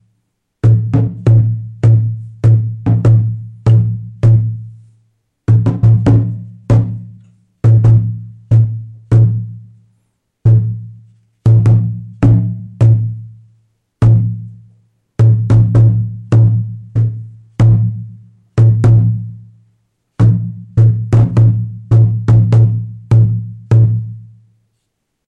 I needed a 17th century drummer. Sort of in the style of Evil Dead "Army of Darkness" but without all the surrounding noise. This is actually a Tanzanian drum (from the 1970's), recorded with a Zoom H2Next and post processed in Audacity. It is slightly pitched down and limited with Waves L1.